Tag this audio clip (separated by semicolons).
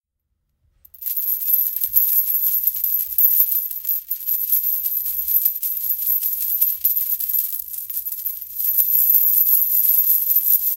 tiny; petit